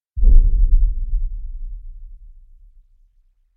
something like a drum with air effect